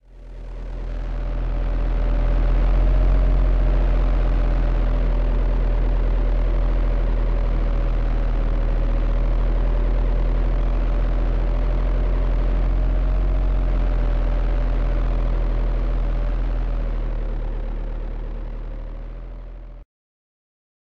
Heavy machinery at work
Excavator and trucks in a gravel pit.
created with the z3ta + waveshaping synthesizer. 4 oscillators, used pitch- and modulation wheel.